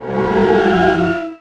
Magic Pass-By
Something not entirely real goes zooming past. Possibly a spell of some kind.
cast, jet, magic, spell, swish, whoosh, wizard, woosh, zoom